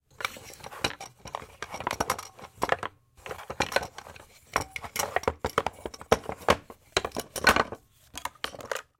Rummaging Through Wooden Toys v2 - tight micing
An attempt to fill a request for the sound of rummaging through a wooden chest (trunk) filled with wooden toys...tight mic placement for variation.
Gear: Zoom H6, XYH-6 X/Y capsule (120 degree stereo image), Rycote Windjammer, mounted on a tripod, various wooden toys/items.
foley, mic, wood, wooden, close, chest, ADPP, antique, tools, objects, trunk, toys, tight